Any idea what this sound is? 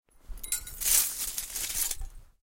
Broken glass shuffled and gathered on a felt mat. Close miked with Rode NT-5s in X-Y configuration. Trimmed, DC removed, and normalized to -6 dB.